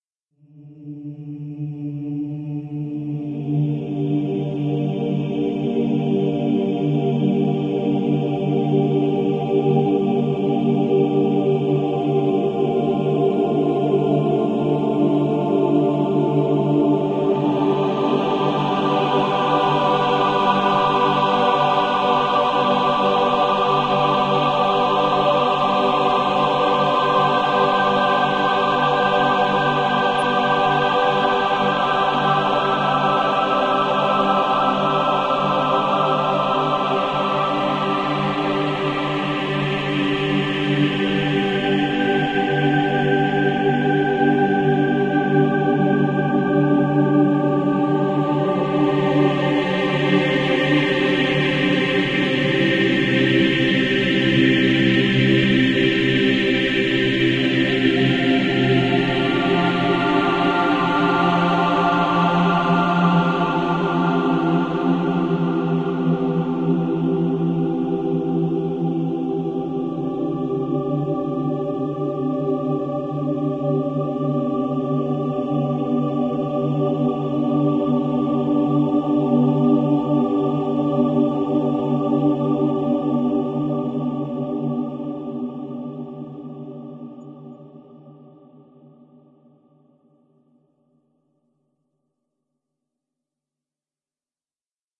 NEW INTRO FEB 2012 TDAR
Intro for my band The Day After Roswell
Angels, choir, metal